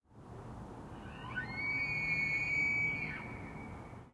Recording of an elk in Banff, Alberta, during mating season. The cry is very distinctive and somewhat frightening. Recorded on an H2N zoom recorder, M/S raw setting.